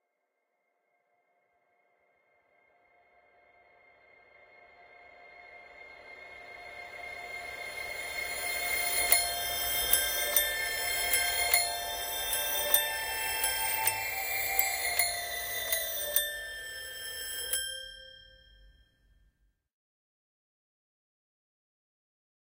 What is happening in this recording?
jack jill reverb (creepy)
The tune "Jack and Jill" played on an antique toy piano. Same as "jack jill toy piano" but with a real creepy reverb added.
Sony ECM-99 stereo microphone to SonyMD (MZ-N707)